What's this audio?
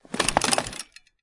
bike drop2
A bike falling on the ground, recorded with a Zoom H2.
chain, fall, hit, impact, wheel